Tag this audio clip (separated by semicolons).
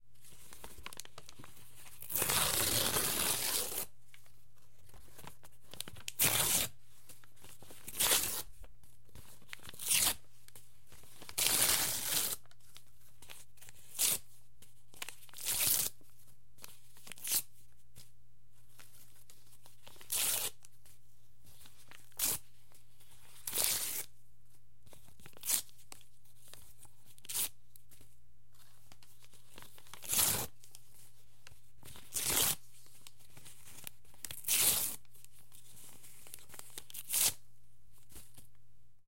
Ripping; Paper; thin; Rip